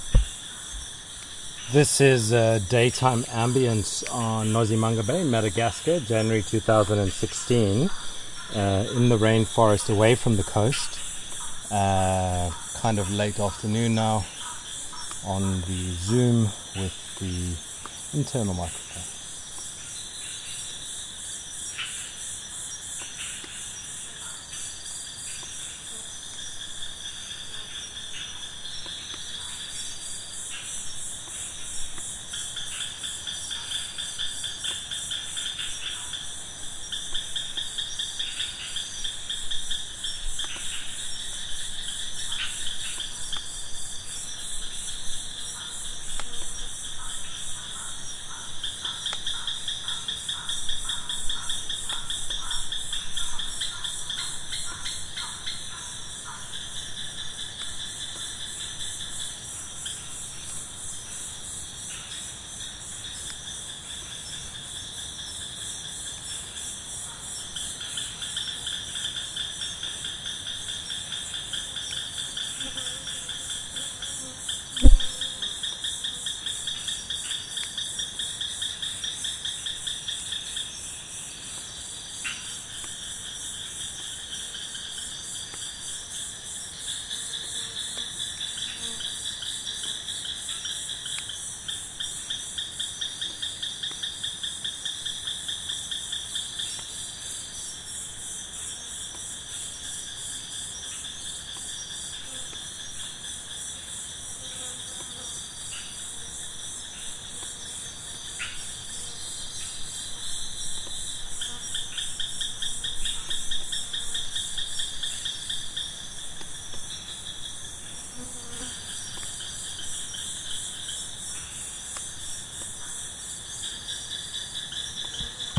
Forest Ambience
Field recording in Parc Mosoala Madagascar. Rainforest daytime.